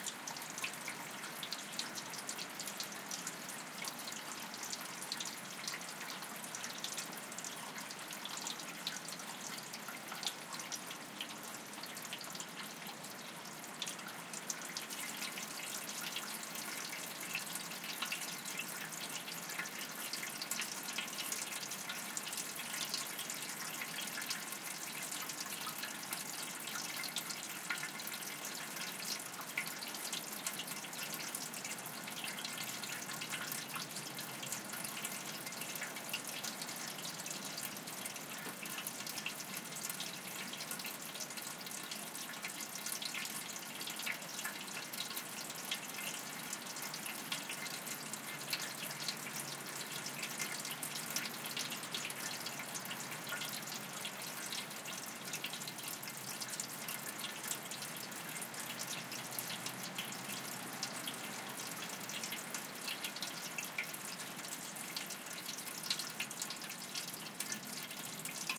Water trickling in a metal down pipe attached to a gutter in the rain. Recording chain: Rode NT4 (in Rode Blimp) - Edirol R44 (digital recorder).
tinkle, rain, drainpipe, drain, water, trickle